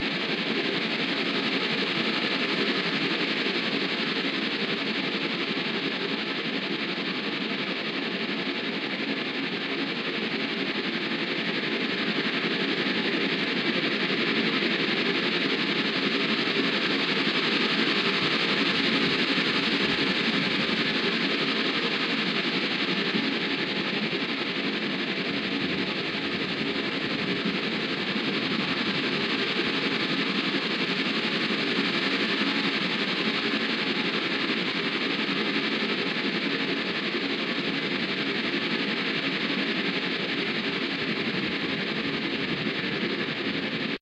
Steam Train (processed)
Sound source was processed to sound like moving train engines.